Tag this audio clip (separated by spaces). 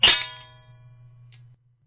Hit Battle Clash Sword Claymore Weapon Fight Medieval Swing Sabre